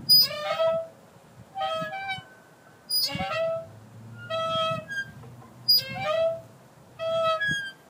Swing Horn
A playground swing that needs oiling because it sounds like a broken or badly-played horn of some sort.